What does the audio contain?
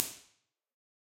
Mejeriet bred lineaudio MP
IR, Dogbreath-studio